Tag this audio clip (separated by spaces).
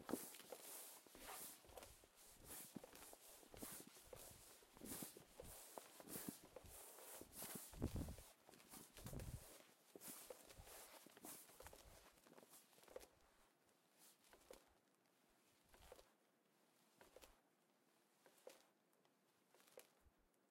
canvas flap